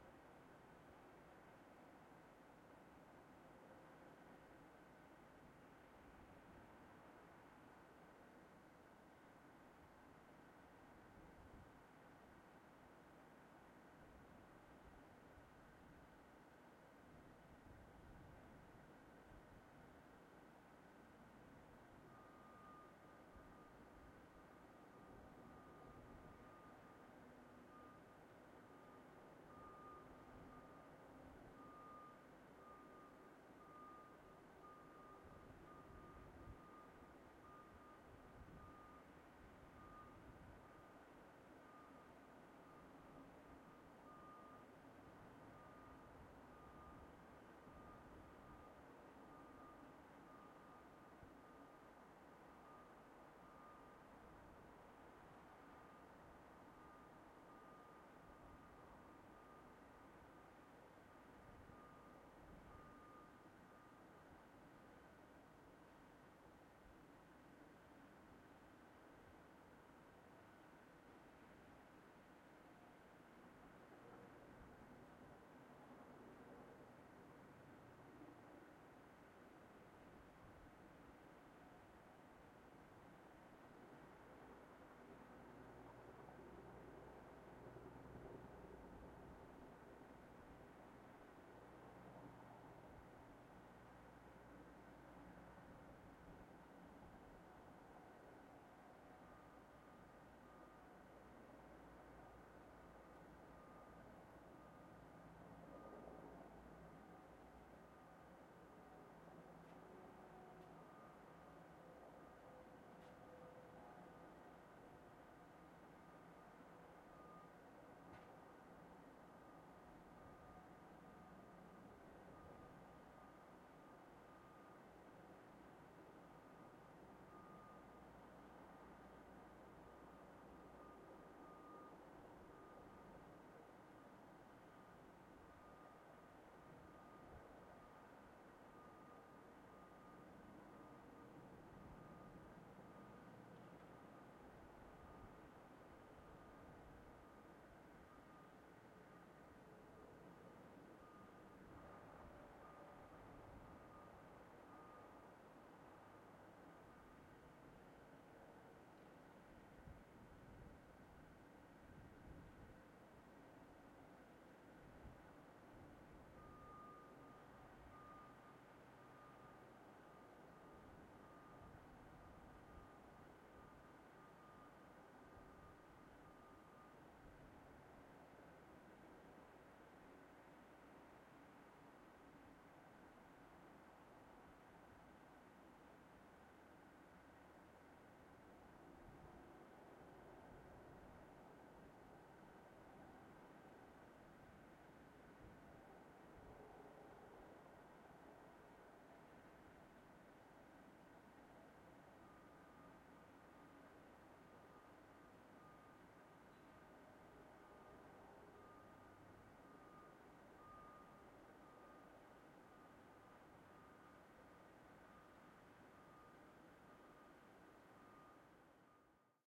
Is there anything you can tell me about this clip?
ambience winter train station outdoor quiet distant construction
Quiet winter ambience near train-station in Banff, Alberta. Distant construction and sounds of the town can be heard. Recorded on an H2N zoom recorder, M/S raw setting.
ambience, train-station, construction, field-recording, winter